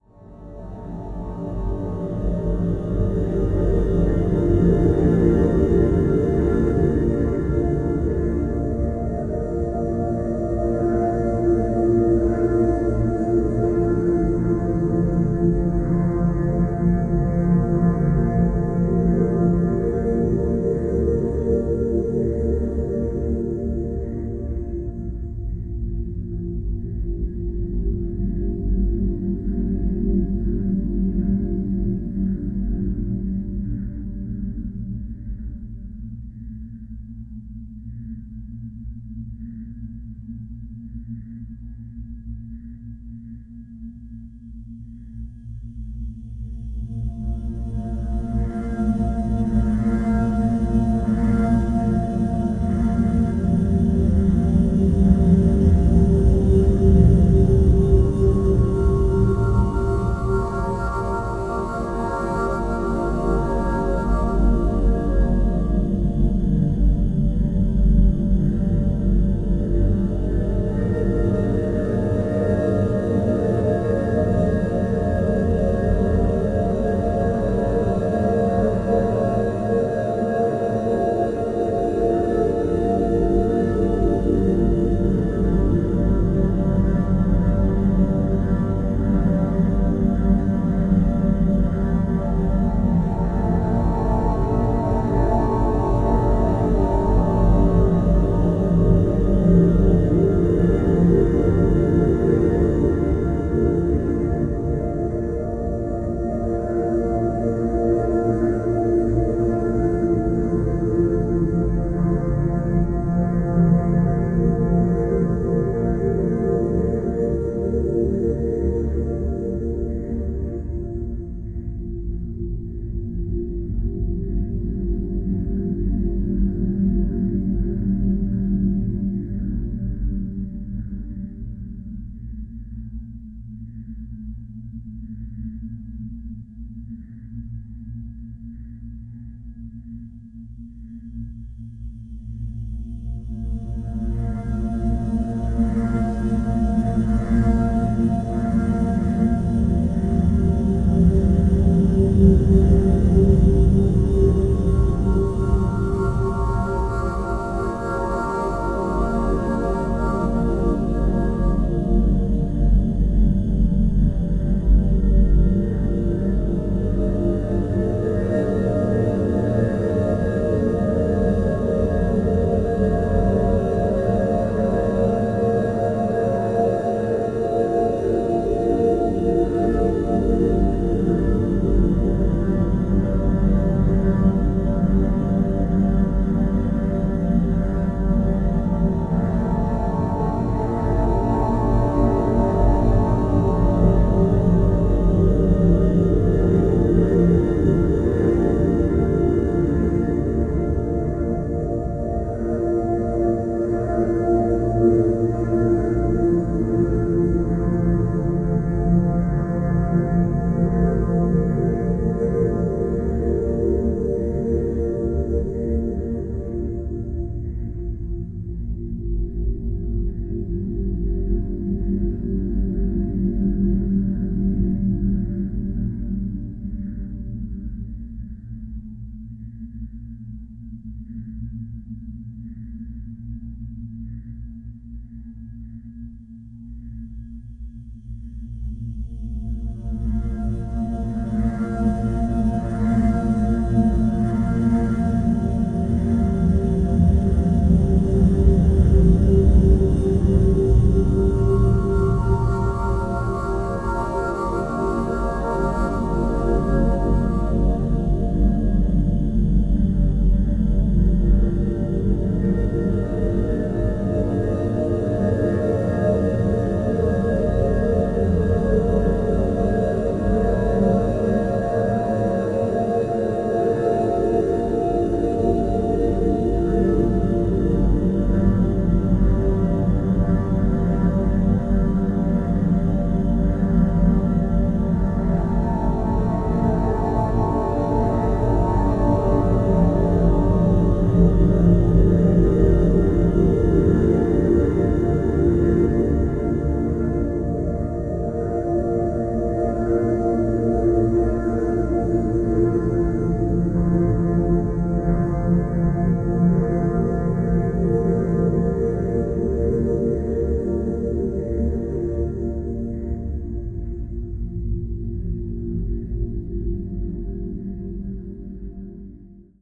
Granular Piano 02
Granular processed piano
Experimental, Noise